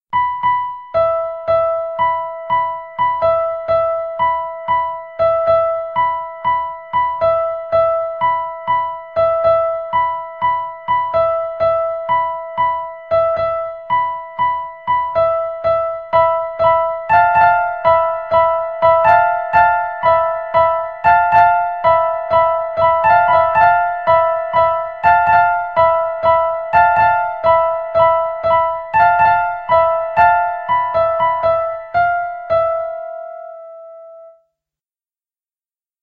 A simple piano melody created for the Music Stock of CANES Produções.
I can't describe this piece, it starts calm, then the chase feeling kicks in. Could work as a suspenseful scene as well. But you're the directors, have fun!
film, soundtrack, cinematic, chase, sting, movie, driving, loop